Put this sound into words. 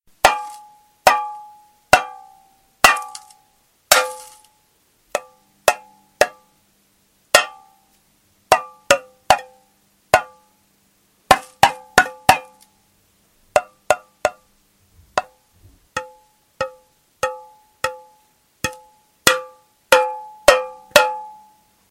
Tapping, Pringles Can, A
Several taps and hits of a finger on the base of a Pringles can.
An example of how you might credit is by putting this in the description/credits:
Base, Bash, Hits, Metal, Pringles, Tap